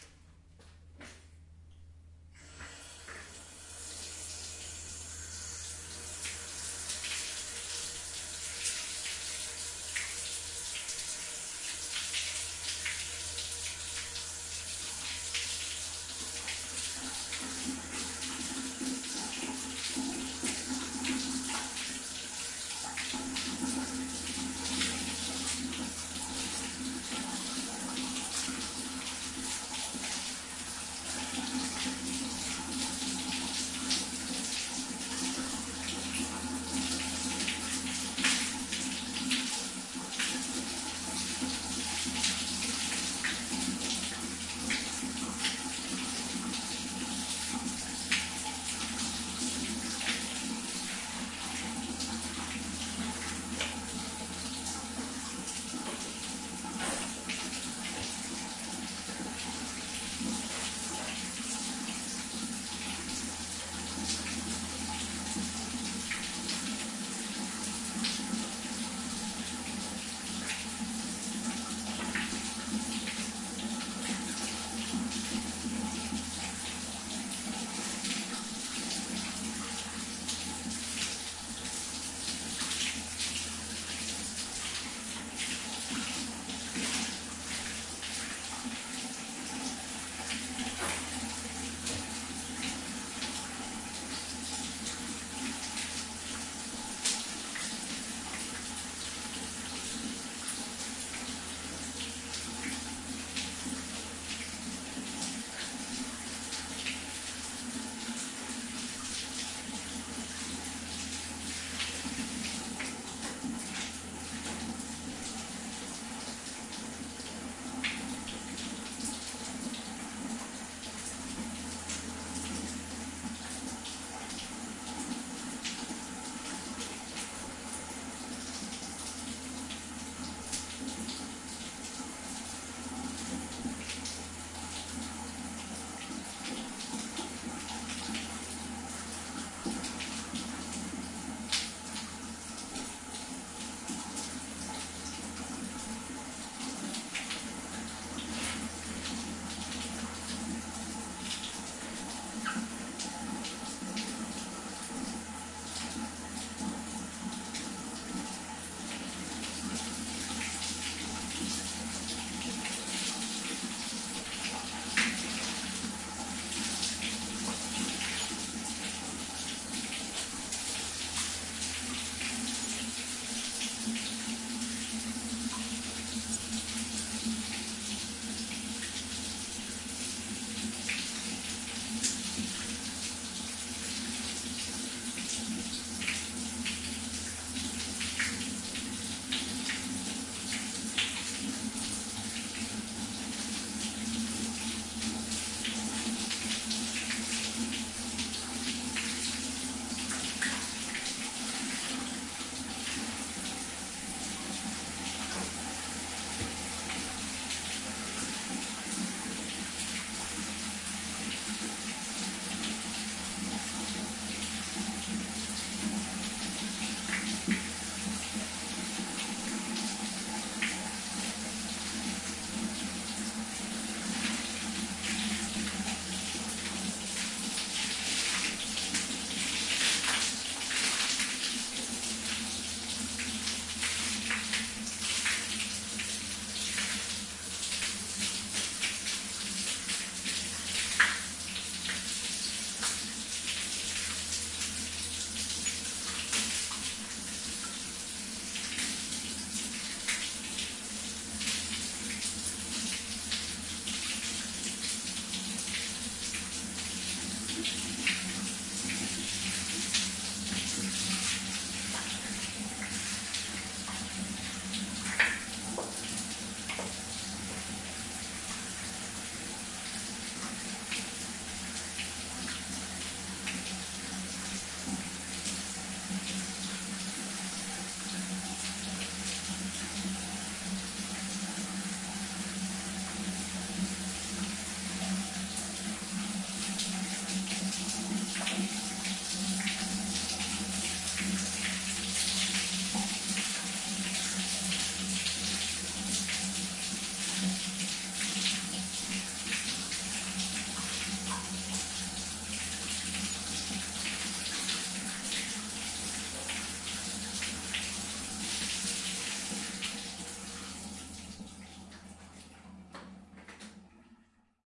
10.08.2011:eleventh day of ethnographic research about truck drivers culture. Night. I am taking shower in social building used by workers of fruit-processing plant.